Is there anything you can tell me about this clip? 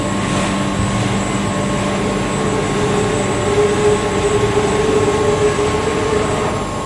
Hand Crane
Factory, low, Machinery, engine, high, Buzz, electric, medium, Mechanical, motor, Rev, Industrial, machine